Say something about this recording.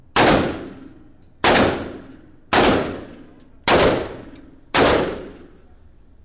Firing indoor at Nexus Shooting Range.